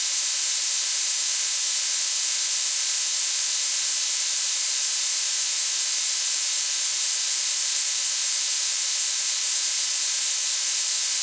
some noise made to sound like the noise generators used in analog drum synthesizers for things like cymbals
made with helm, 4 comb filters, and a high-pass filter. helm to generate square wave dissonance, comb filters to add stereo and more "structured noise" quality, and high pass filter to hide the lower overtones
thanks for listening to this sound, number 423877